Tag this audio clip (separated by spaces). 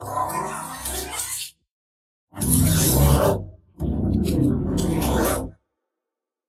robotic alien monster robot